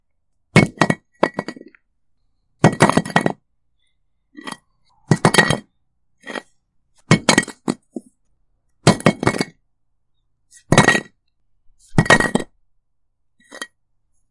brick being tumbled on concrete